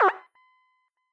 This is a short bleep sound with a pitchblend effect.This sound was created during an experimental session in Ableton Live 7 with various VST plug-ins. It is intended to be used as part of an electronic or glitch "percussion" kit.